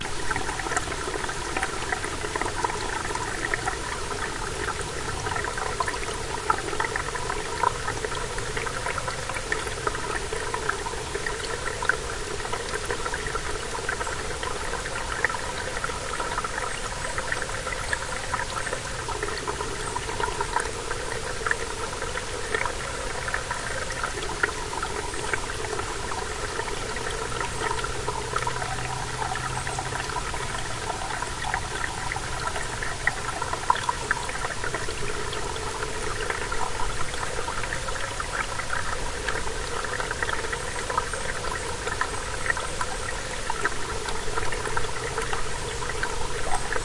Small spring flowing out of the ground through rocks with the wind in the trees along the Watershed Trail in Nolde Forest, Mohnton, PA.
Recorded with a Tascam PR-10.